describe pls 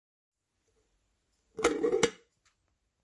Jar lid
closing a jar
close, jar, kitchen